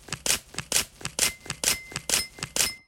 AUDEMAR Emma Devoir4-paparazzi
For this sound, I chose to create the sound when we taking a picture. To create this noise, I simply tore a piece of paper and repeated the noise several times in a row. After, I added a sound of ding to create the sound of heels on the red carpet !